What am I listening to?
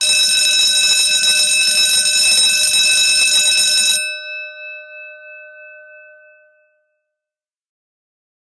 Recording of 6 inch AC bell on 50Hz supply. Using AKG 451 and Matu 828-3 interface. Typcall of bell used for school lesson change. Mic plaaced about 30 cm from bell. on carpet floor.
AC-bell, bell, Lesson-bell, School-bell